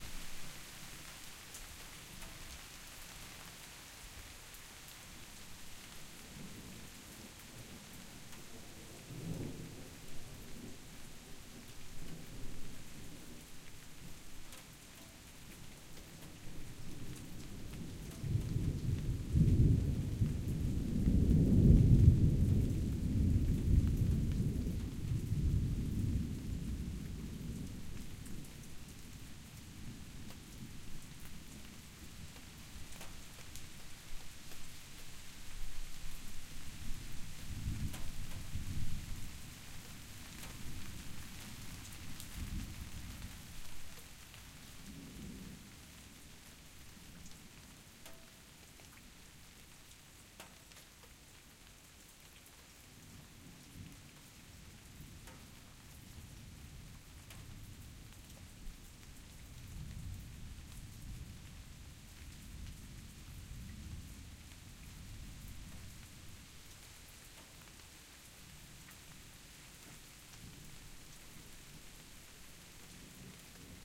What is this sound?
rain and distant thunder 2
A late afternoon thunderstorm on a very hot day
storm, thunder, distant, rain